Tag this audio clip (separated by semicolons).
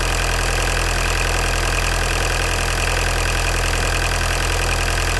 racing; engine; revving